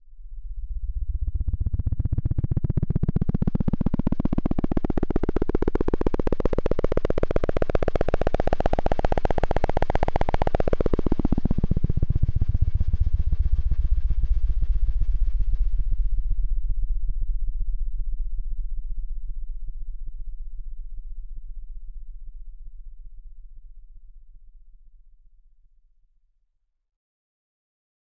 Black Hawk helicopter flying over city buildings.
Sound was synthesised with Logic's ES2 synth.